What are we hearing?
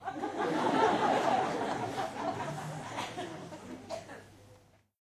LaughLaugh in medium theatreRecorded with MD and Sony mic, above the people
laugh, audience, czech, theatre